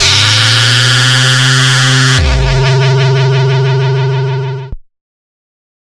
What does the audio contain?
Alien Alarm: 110 BPM C2 note, strange sounding alarm. Absynth 5 sampled into Ableton, compression using PSP Compressor2 and PSP Warmer. Random presets, and very little other effects used, mostly so this sample can be re-sampled. Crazy sounds.
110, acid, atmospheric, bounce, bpm, club, dance, dark, effect, electro, electronic, glitch, glitch-hop, hardcore, house, noise, pad, porn-core, processed, rave, resonance, sci-fi, sound, synth, synthesizer, techno, trance